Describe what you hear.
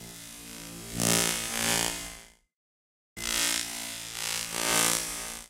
Electric Source
Buzzing, Buzz, Electricity